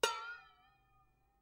A little hit to a metalic water boiler. Funny